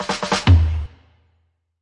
Intro 04 130bpm
Roots onedrop Jungle Reggae Rasta